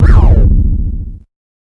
A retro video game explosion sfx.
explosion, nes, nintendo